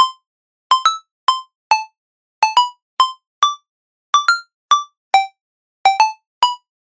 04 TROT 8VA
3, ring-tone, trot